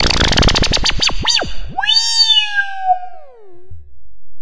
Cat Meow
This sound is made using the OP-X Synthesis with some added reverb.
4x4-Records, Bass, Clap, Drum, Dubstep, EDM, Electro, House, J, Kick, Lee, Music, Open, Stab, Synths